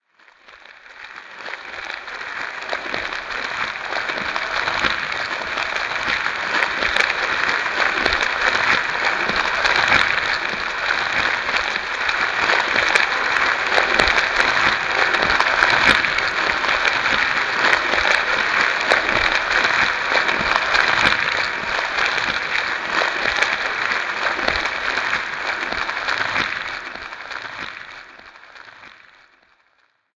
I wanted to make a raining sound effect for my game, but live in a dry climate, so I recorded paper rustling. This is one of my older sounds and was modified in Audacity.
rustling, page, crumpling, crinkling, rustle, paper, pages, crumple